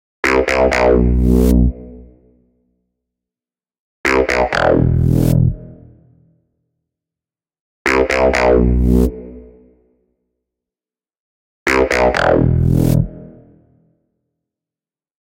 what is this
07 bassloop electronic
Electronic bass loop extracted from an Ableton project that I chose to discontinue. I used RobPapen Blue to create the sound and some effects like saturation for processing. Lowcut below 35Hz.